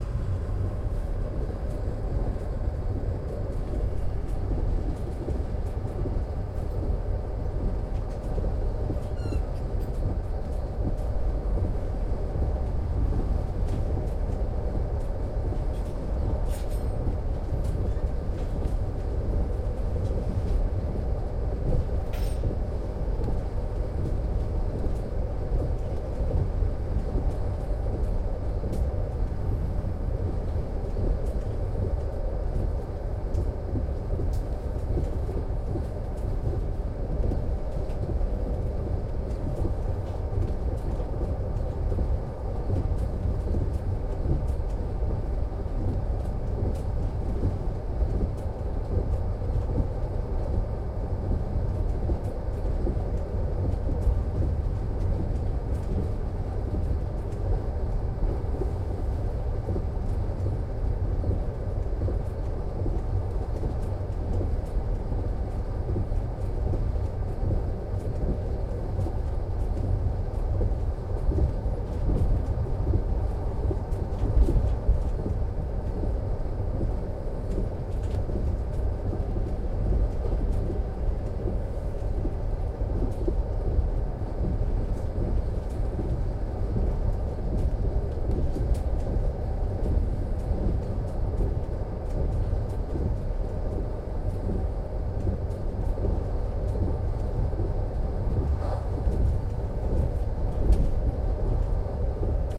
night passenger wagon 4
Ride in the passenger wagon at night. Conductor clank dishes. Creaking door.
Recorded 30-03-2013.
XY-stereo.
Tascam DR-40, deadcat
train, night, conductor, passenger-wagon, passenger, wagon, railway, rumble, travel, trip, dishes, clank, noise